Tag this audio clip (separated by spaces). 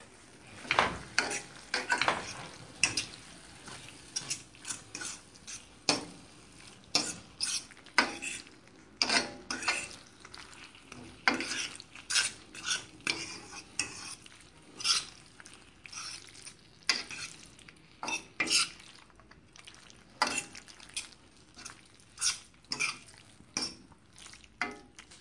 preparation food cooking kitchen pasta